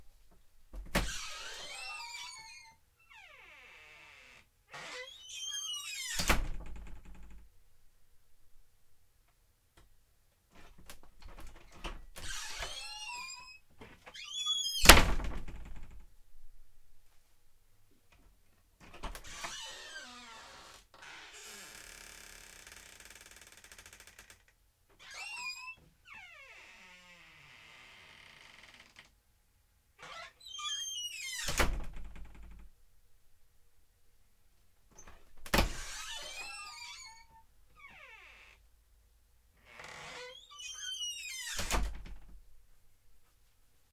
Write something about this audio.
creaky wooden door and handle-low
Recordings of the epic creaking sounds from my office door. Great effects here for classic horror or just foley for an old house.
This is a old-fashioned six-panel wooden door with a metal handle (not a knob). I installed it in about 2008 or so, and have never oiled the hinge, so it's got a pretty wicked creak now in 2013.
Final recording of the door -- I turned the mic gain way down, so now the door closing thumps do not clip and they sound good on the recording. I also got in one good slam of the door. I have two other recordings with higher gain if you're more interested in the creaking sounds.
slam, handle, door, wooden-door, creak, hinges